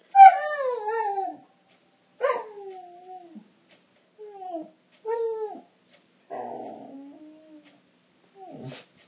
Dog Whining
I recorded my dog barking after I hit a single note on my piano. Recorded using my ipad microphone, sorry for the lack of proper recording. I figured I would just nab it while he was feeling talkative!
barking, beagle, dog, howl, nose, pooch, puppy, whine, yip